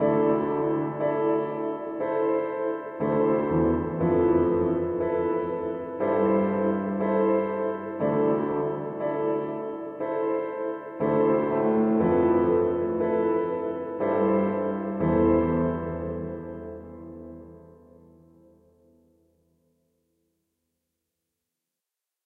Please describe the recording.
lonely piano4 60bpm
jingle, ambience, trailer, soundscape, chord, movie, suspense, interlude, horror, piano, spooky, mood, background-sound, pad, scary, radio, instrument, instrumental, background, music, loop, atmosphere, drama, dark, ambient, film, cinematic, dramatic